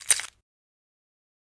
inspect item
The sound of an item being picked up.
item, object, pickup